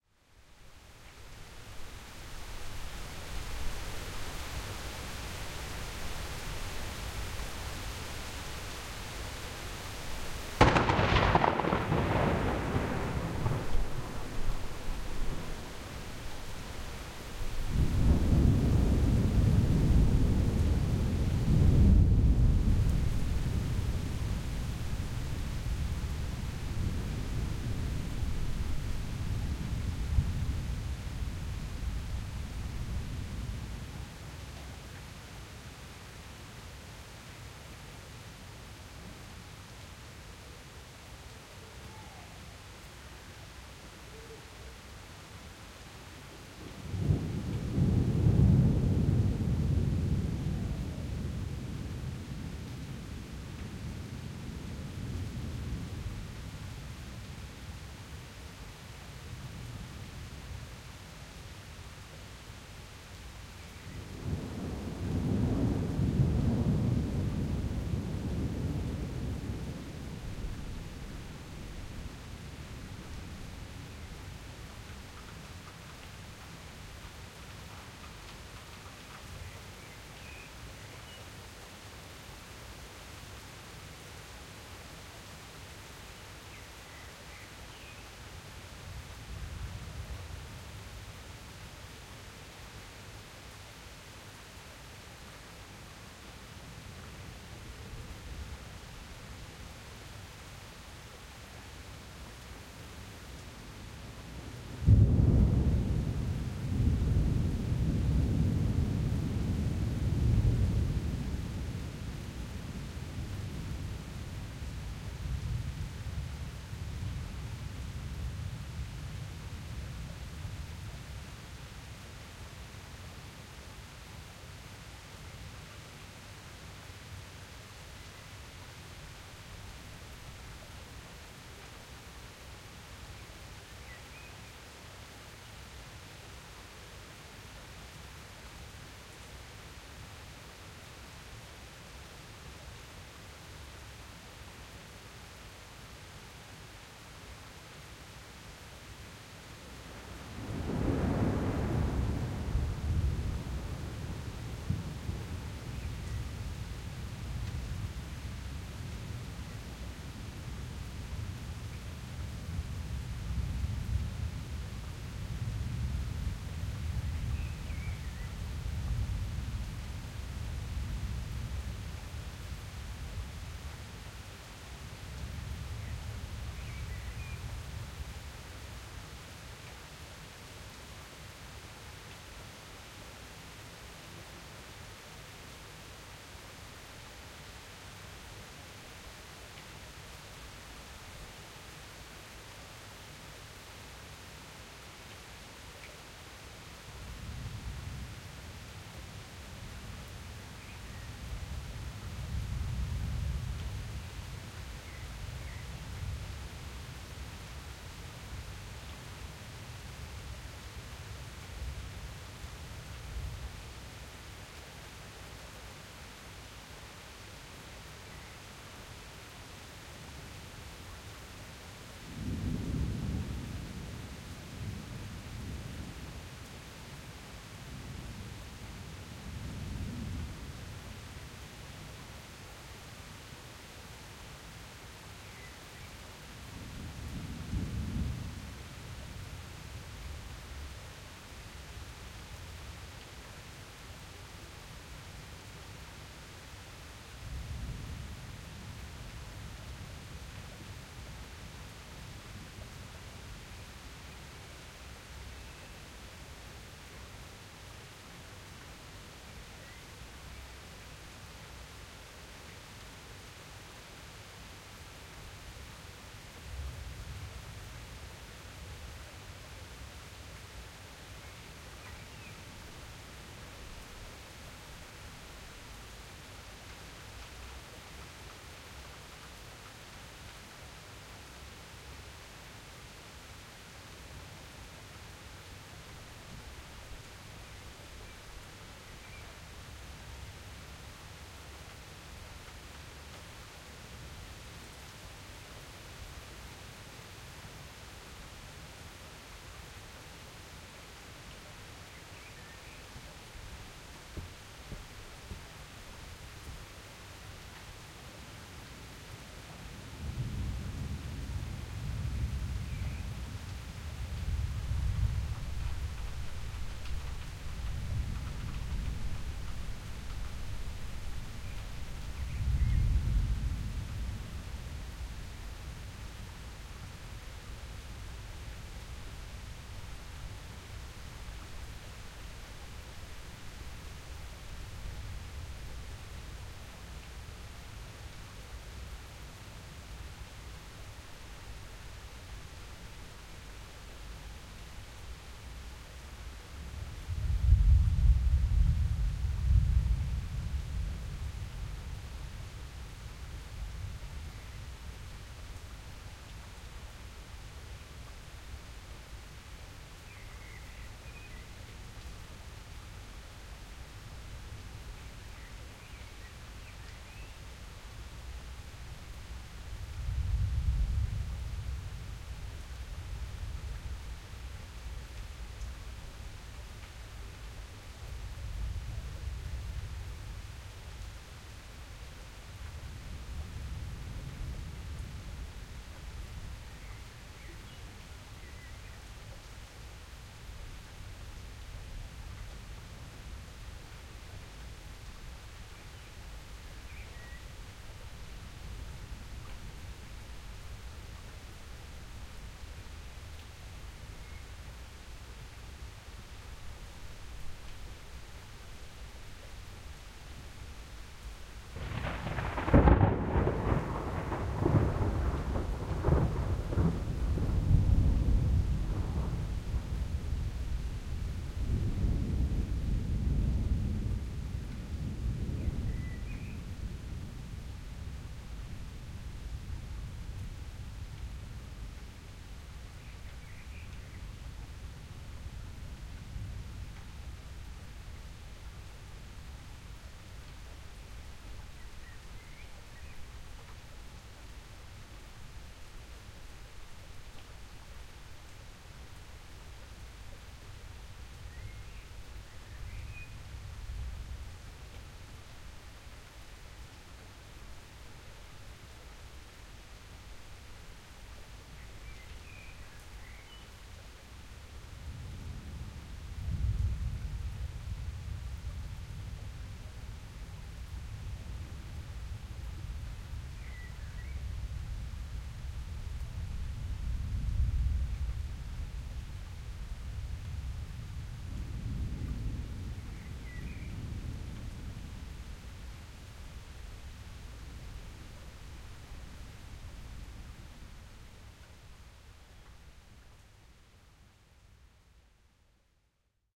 gewitter berlin
stereo recording of a thunderstorm. enjoy.
field-recording, rain